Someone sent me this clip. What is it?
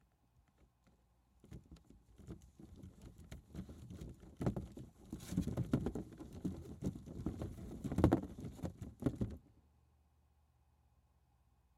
Stirring Baseballs FF114
Stirring Baseballs Thump bump movement
Baseballs, Stirring, Thump